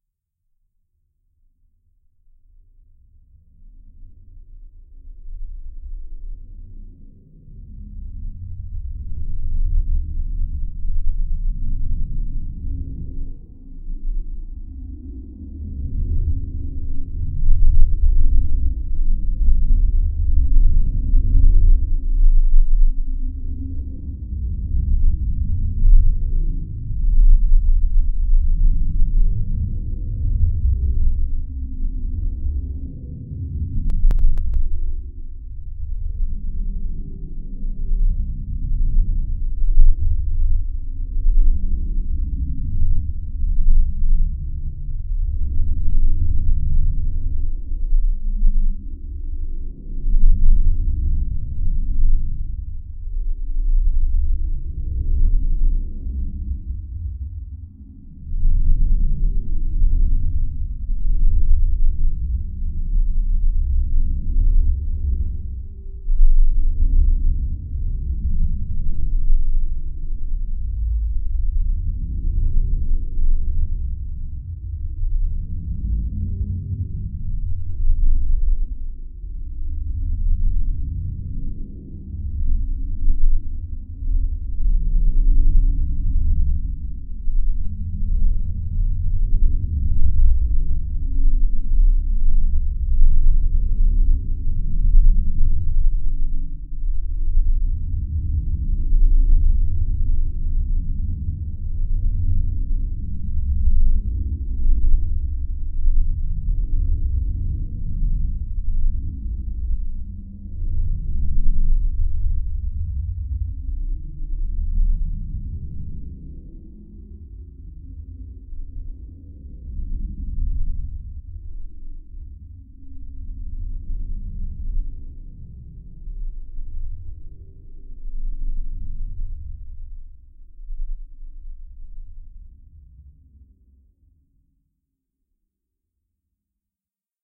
Mothership Hum
A general mothership sound for Sci fi scenes